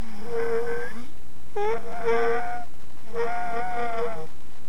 A vocal squeak made by stringing together different tones
annoying, rrt, screwing, sound, squeak, squeaking, squeek, twisting